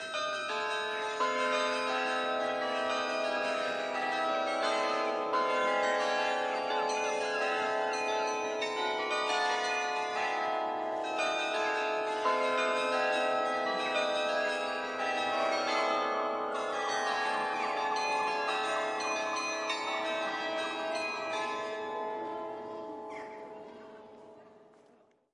140728 Tico Tico no Fuba Chimes
Chimes of a church or city-house tower playing a well known brazilian chorinho tune, "Tico Tico no Fuba", in the city of Vlissingen, Netherlands. Voices of pedestrians and cries of jackdaws or seagulls to be heard in the background. The recording is part of the sound file
Zoom Hn4